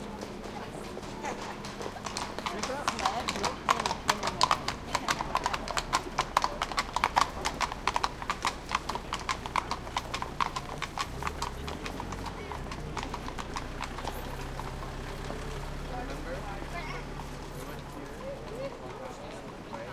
Innenstadt 1b Pferde
Recording around the "Stefansplatz" in vienna.
vienna, stefansplatz, people, horse, field-recording